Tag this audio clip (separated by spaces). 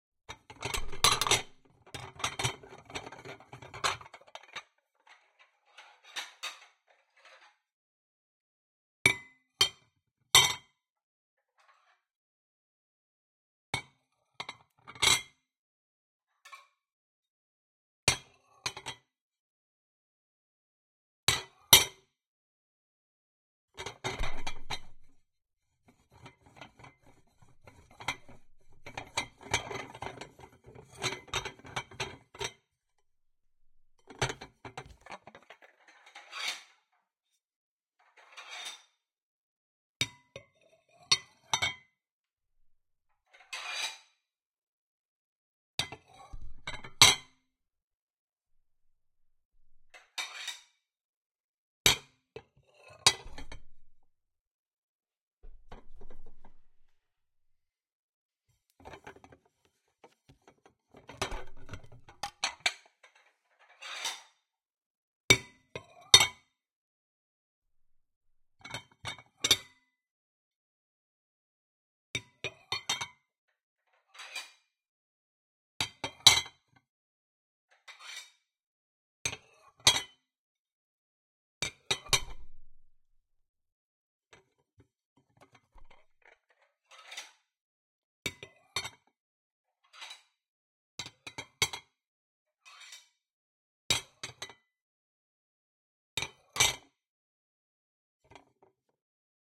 dish dishes Foley onesoundperday2018